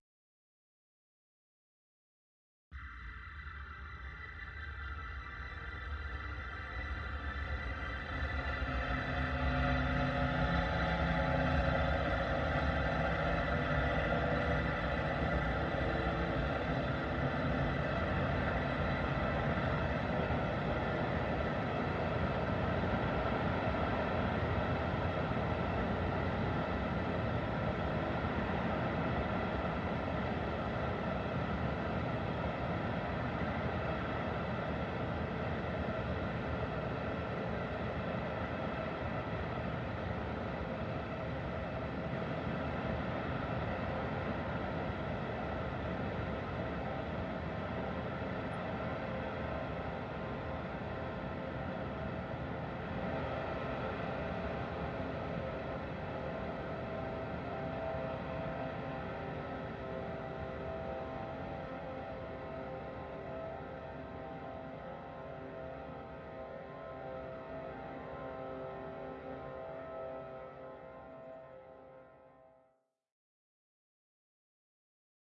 a momentary lapse of reason 2
drone, Experimental